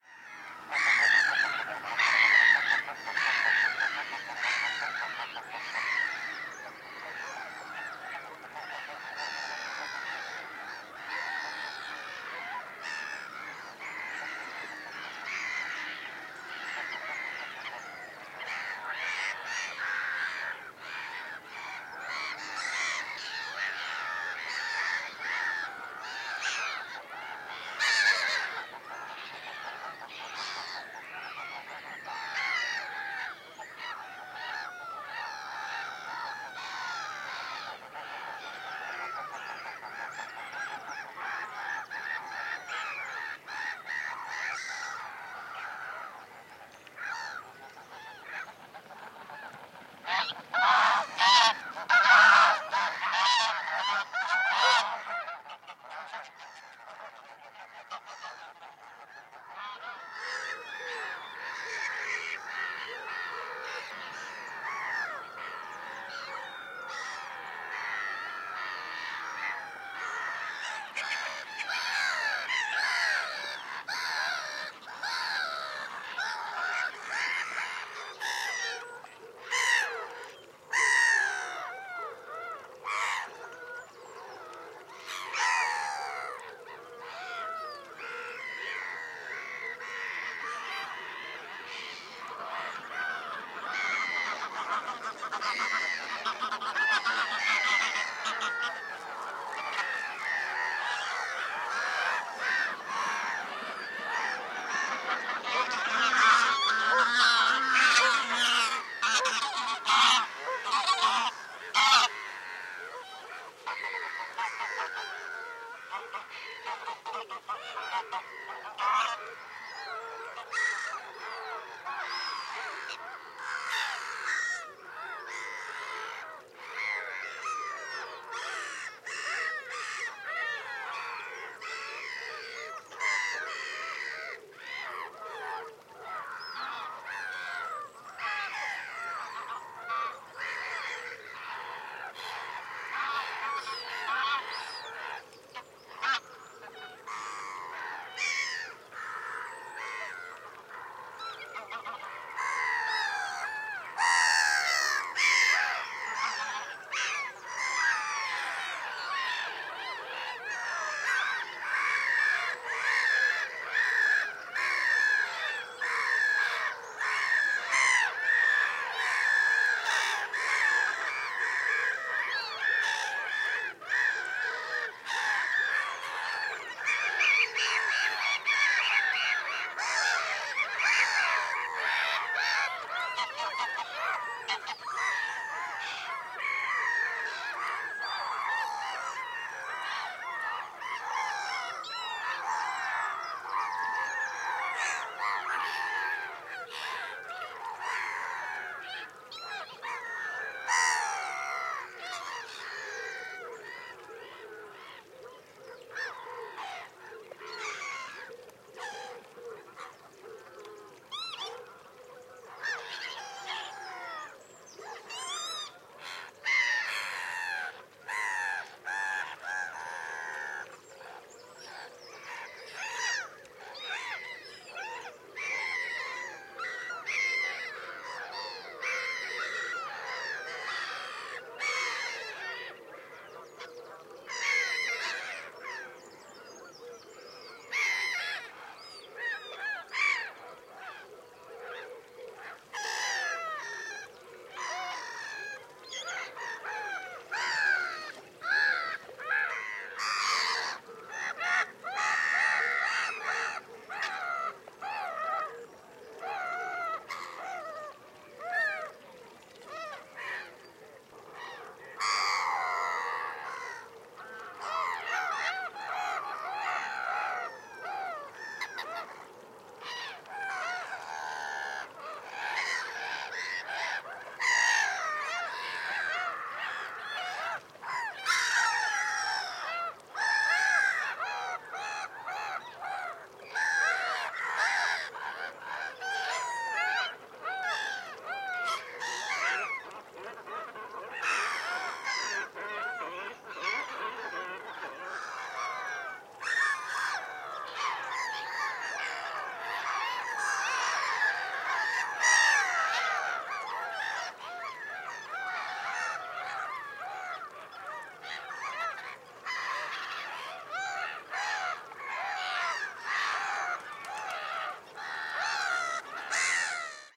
This was recorded between 05:00 and 05:30 on the 6th August, 2018. The main birds that can be heard are black-headed gulls, with greylag geese. Other species include woodpigeons and Egyptian geese.
The recording was made with a Sennheiser K6/ME66 attached to a Zoom H5. The volume has not been altered (apart from some fades) and several recordings were put together. These were added in the order they were recorded. No noise reduction. Editing was completed using Audacity.